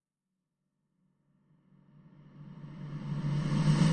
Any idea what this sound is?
reverse fx 1
buildup, reverse, swell, sweep, fx, uplifter, riser, build, up